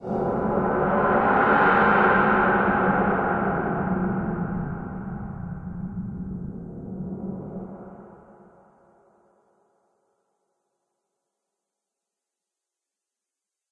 Eerie Moment
Ambient eerie cinematic short sound effect for video editing, game, film, trailer, and commercial business use.
airy, ambient, atmospheric, bumper, cinematic, creepy, dark, deep, eerie, evil, fear, halloween, haunted, horror, intro, low, outro, riser, scary, sinister, sound, spooky, sting, suspense, synth